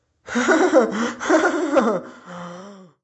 este audio hace parte del foley de "the Elephant's dream"